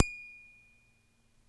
Recording of me plucking the strings on the headstock of my cheap Rogue guitar. Recorded direct to PC with a RadioShack clip on condenser mic.
pluck, guitar, headstock, electric, string